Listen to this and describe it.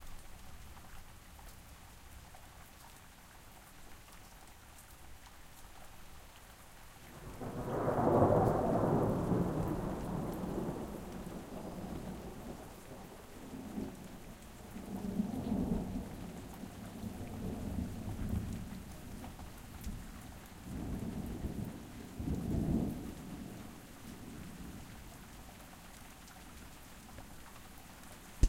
Rain and Thunder 5
field-recording, lightning, nature, rain, storm, thunder, thunder-storm, thunderstorm, weather